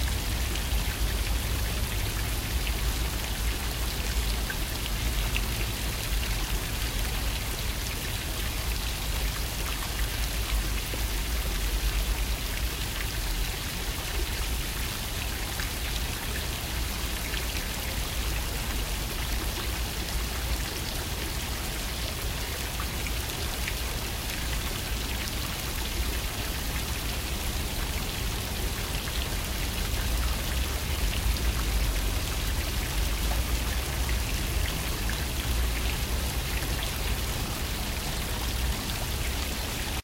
Hong Kong Chi Lin nunnery waterpond
chi, waterpond